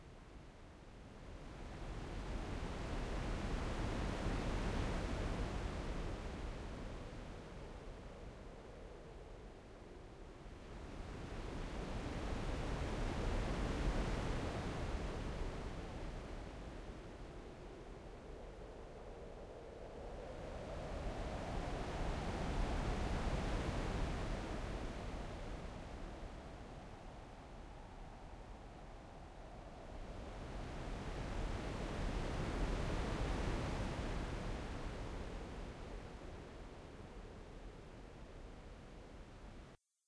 Ocean Waves
A sample that sounds like waves crashing on a beach. I created this using FabFilter Twin 2 after a session exploring the different XLFO's and filters of this amazing Synth.